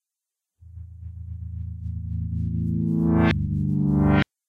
File Convert
A computer sound for when a file is being converted to a different format, or information is being translated.
Maybe even a log-on or log-off sound. Who knows?
computer, interface, programming, scifi